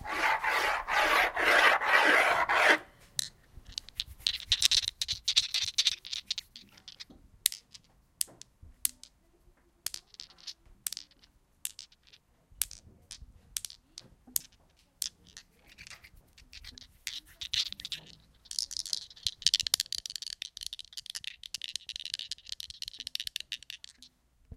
Here are the sounds recorded from various objects.
ball, france, lapoterie, mysounds, rennes